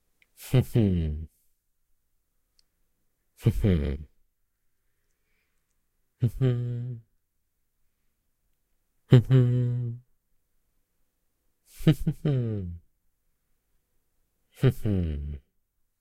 Voice Man mmh proud closed-mouth
Tadaa
Interjection, man happy and proud showing something. Closed mouth. Bass voice.
Recorded wiht Zoom H5
brag, bragging, closed, expression, happy, hum, human, male, man, mouth, proud, showing, vocal, voice